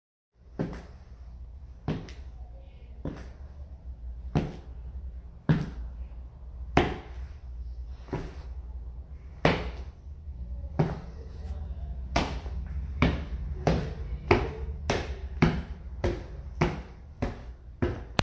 Slow walking to build action before an event. Spring MMP 100 class.
pace, walk, Slow, footsteps